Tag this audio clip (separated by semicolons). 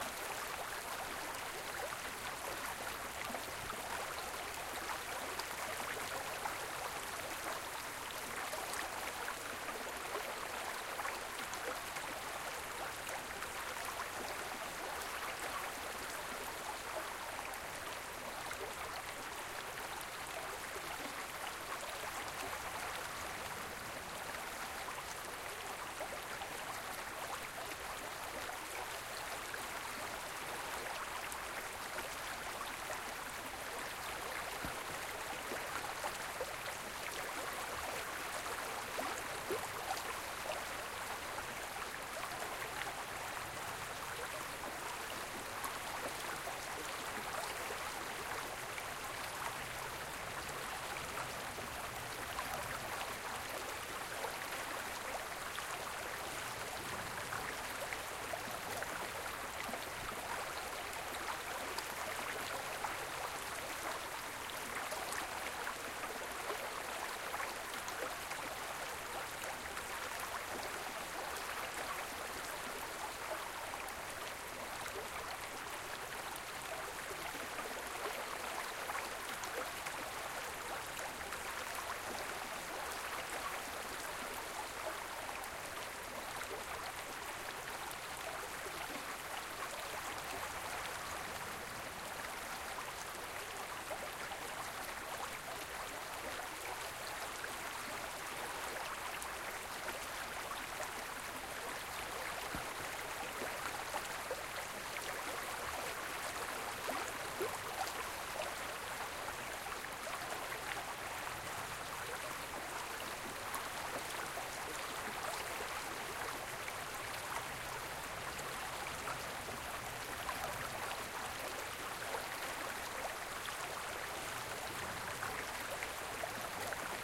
brook; creek; flowing; liquid; relaxing; river; stream; trickle; water